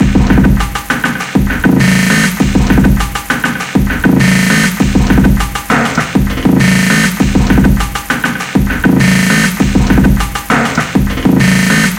cooking indrustrial music loop Mastering
I recorded cooking sounds and used Yellofier.
Edited: Adobe + FXs
cinematic, indrustrial-music, Melody